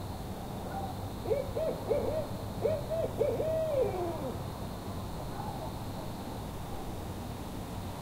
Owl hooting, accompanied by dog barking. Recorded Apr-22-2012 in Arkansas.
Are owls an evil omen?